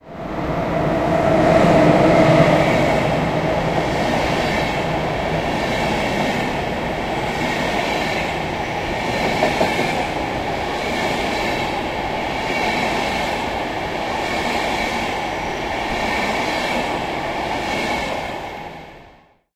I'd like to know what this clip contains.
Passenger Train Passing By
A train driving by at a Berlin train station.